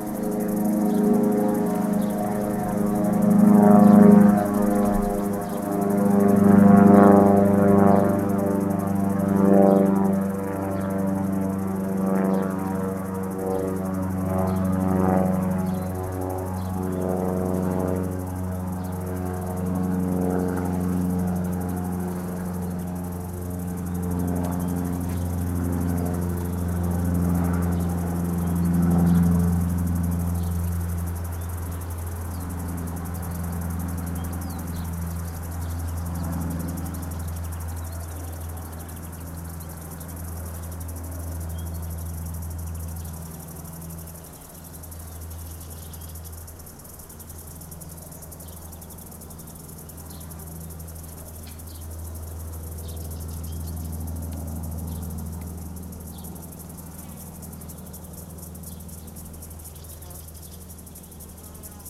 20060620.plane.flies.over.forest.summer00
a plane passes and fades out leaving only the sound of cicadas / un avion pasa y se extingue dejando solo el sonido de las chicharras